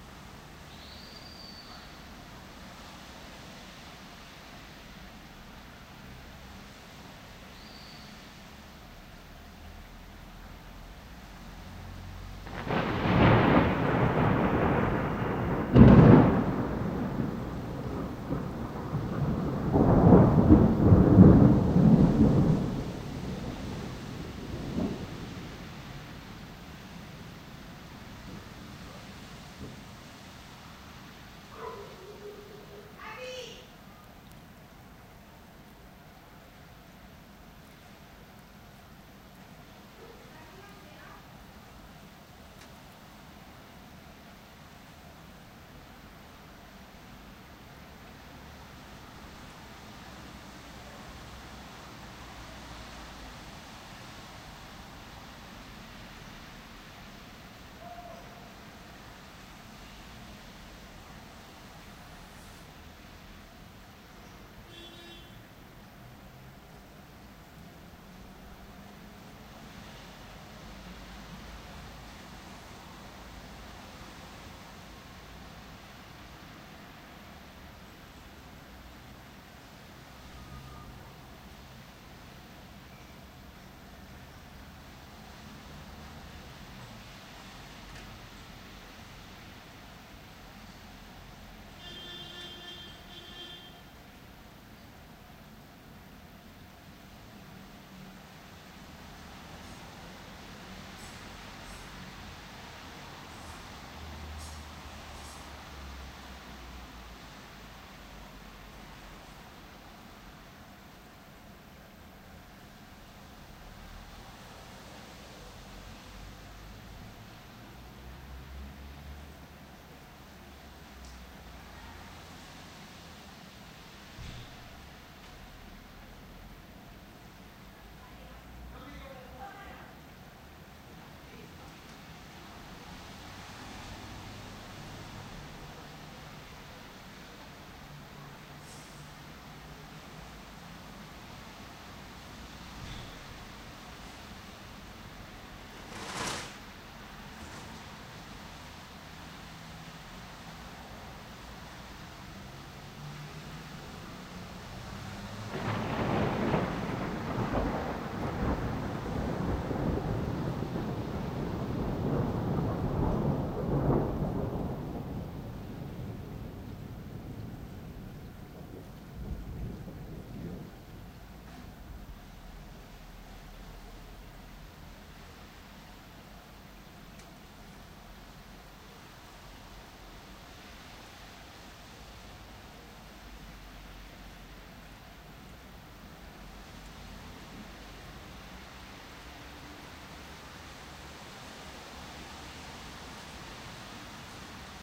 A rainy day with thunders in Villaverde Madrid.